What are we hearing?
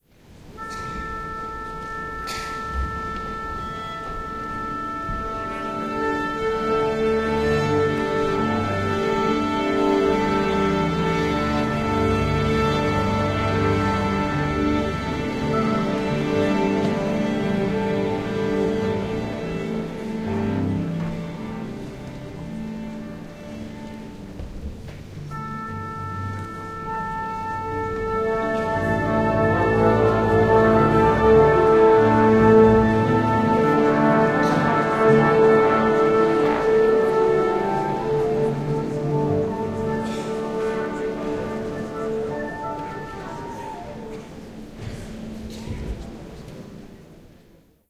SYMPHONY ORCHESTRA TUNING BEFORE CONCERT
Basque Symphony Orchestra tuning instruments before a concert in Kursaal auditorium in San Sebastian
SYMPHONY, ORCHESTRA, TUNING